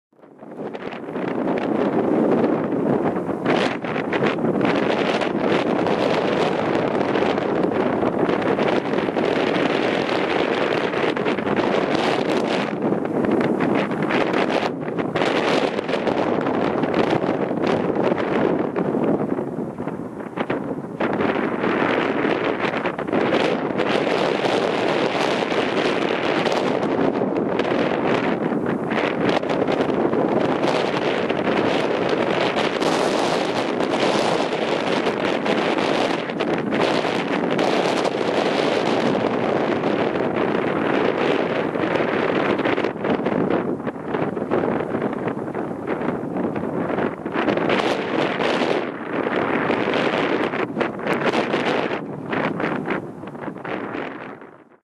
Very strong wind blowing outside , my window. Sounds like avalanche. Recorded with CanonLegria camcorder.